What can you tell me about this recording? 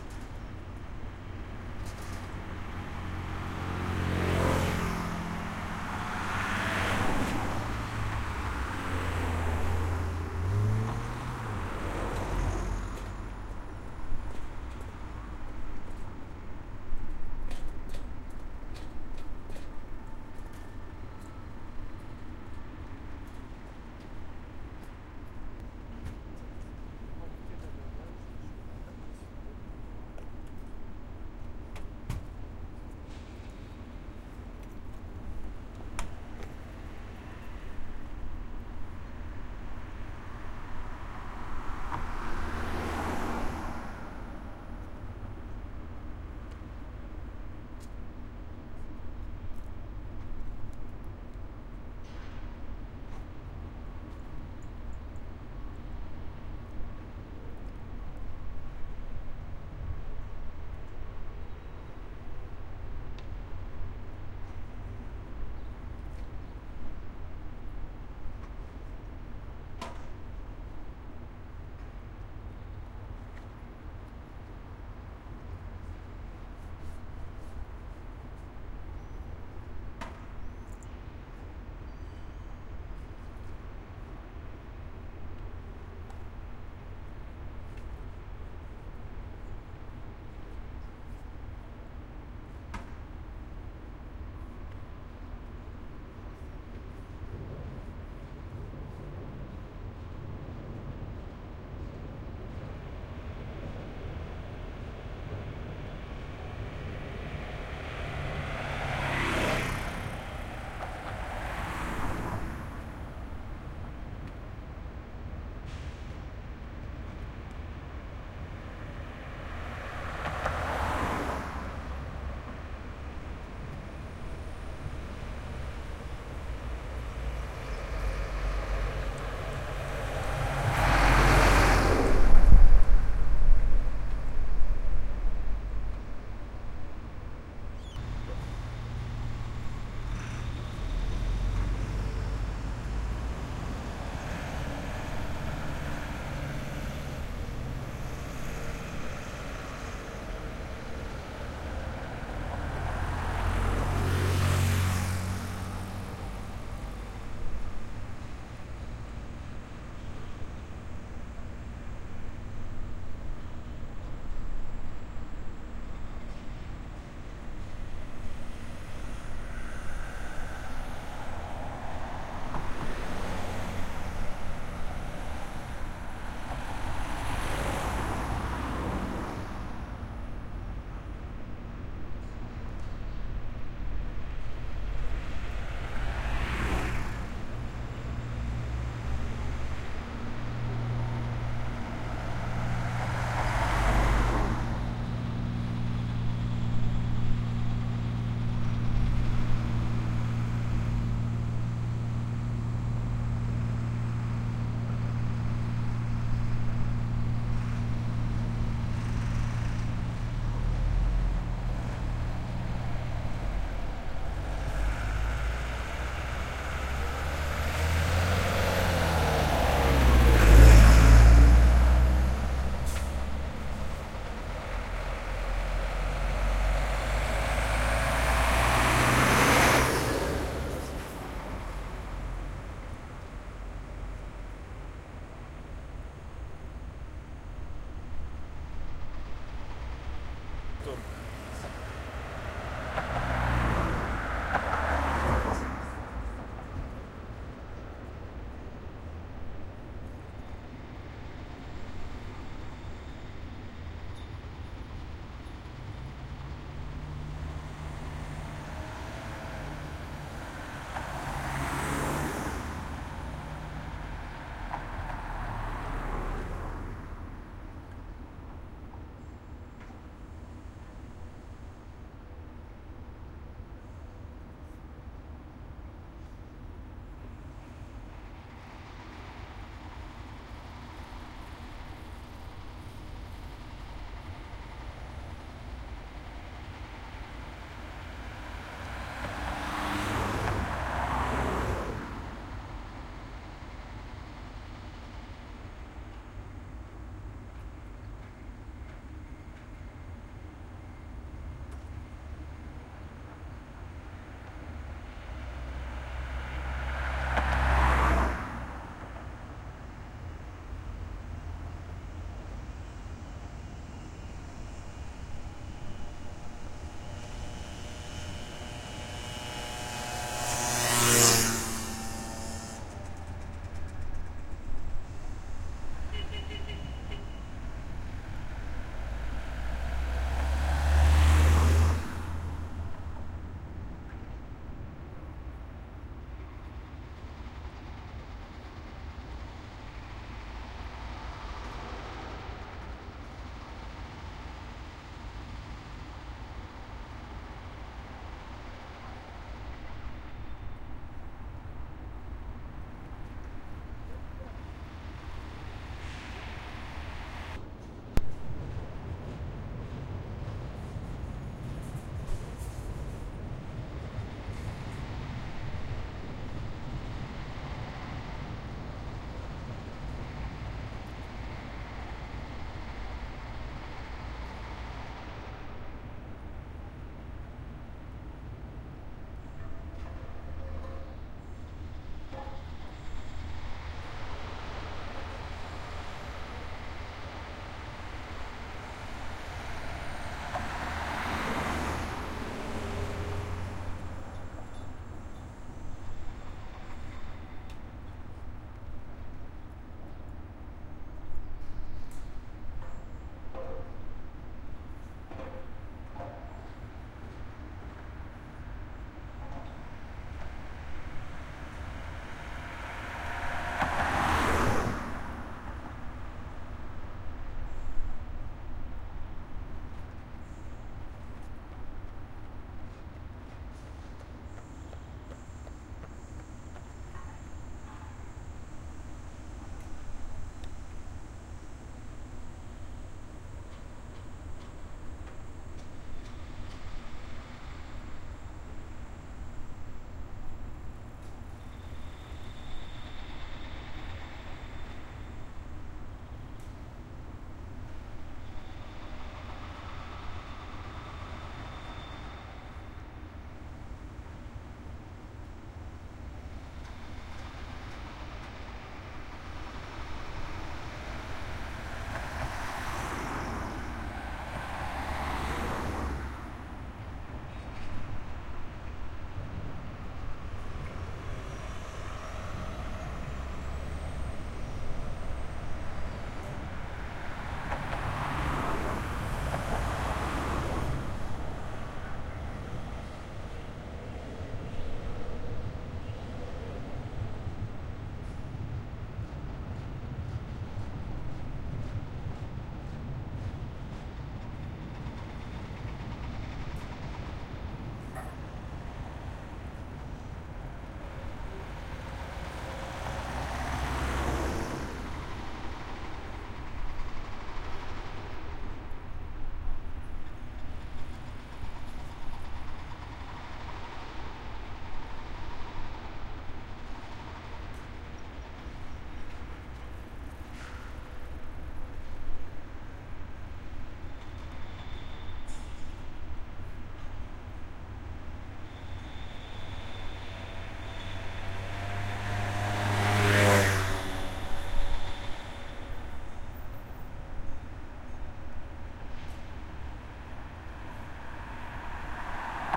Ambiance in town with car passing